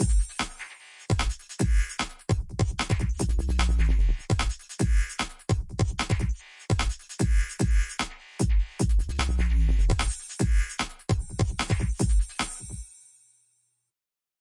Hypo-Beat-150bpm
150bpm, beat, break, breakbeat, dnb, drum, drum-loop, loop, percs, percussion-loop, progressive, rhythm